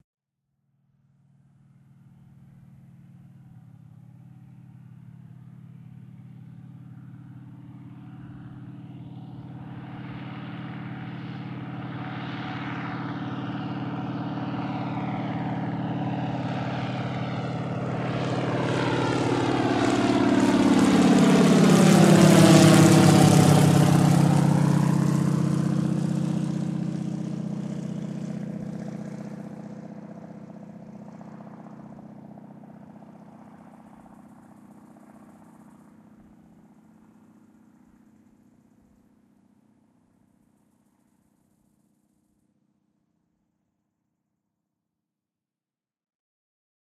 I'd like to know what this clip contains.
PZL 104 WILGA FLIGHT
Recorded by ZOOM H4 in summer 2011 (Radawiec)
aeroplane, field-recording